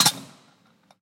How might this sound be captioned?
21d. stacking cups onto the machine
stacking cappuccino and espresso cups onto an espresso machine